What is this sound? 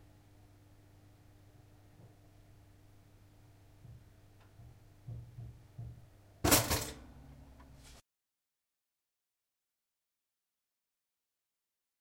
Pop up Toaster
Electric Toaster pops up
Pop, kitchen, toaster, up, boing